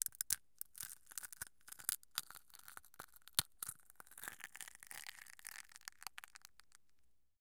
egg - shell crunch - wide - dried 05
Crushing an egg shell that had been cleaned and dried beforehand.
Recorded with a Tascam DR-40 in the A-B mic position.